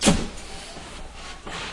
ATIK 2 - 148 stereoatik
MULTI HIT MACHINE SOUNDThese sounds were produced by banging on everything I could find that would make a sound when hit by an aluminium pipe in an old loft apartment of mine. A DAT walkman was set up in one end of the loft with a stereo mic facing the room to capture the sounds, therefore some sounds have more room sound than others. Sounds were then sampled into a k2000.
acoustic, household, percussion